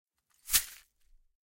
Pile of broken glass gathered in a felt cloth and shaken. Close miked with Rode NT-5s in X-Y configuration. Trimmed, DC removed, and normalized to -6 db.
shake, broken, shuffle, glass